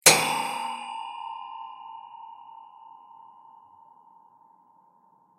Bar heater 2
Single hit on a bar heater recorded onto HI-MD with an AT822 mic and lightly processed.
bar-heater, bong, clang, hit, metallic, ring